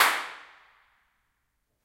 Clap at Two Church 12
Clapping in echoey spots to map the reverb. This means you can use it make your own convolution reverbs
reverb, convolution-reverb, impulse-response, atmosphere, ambient, clap, filed-recording, echo, smack, reflections